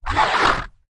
Creature Voice, A4, Dry
Raw audio of scraping a wet polystyrene bodyboard with my hands. Then processed and edited with a pitch shifter in Cubase to sound like a creature. Part of a sound library that creates vocalization sounds using only a bodyboard.
An example of how you might credit is by putting this in the description/credits:
The sound was recorded using a "H1 Zoom recorder" and edited in Cubase on 16th August 2017.
BB, bodyboard, creature, Hidden, monster, stretch, surfboard, vocalisation, vocalization, Voices